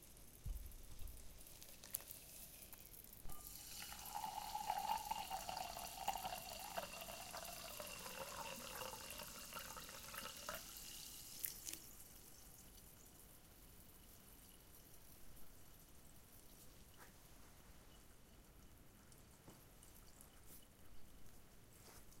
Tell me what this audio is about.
fill glass with soda
A glass filled up with soda ;-)
recorded with Tascam Dr-100 MkII in my room
bottle, carbonated, filled, glass, pop, soda, water